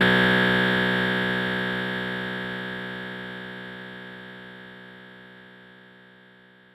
fdbck50xf49delay16ms
A 16 ms delay effect with strong feedback and applied to the sound of snapping ones fingers once.
cross, delay, echo, feedback, synthetic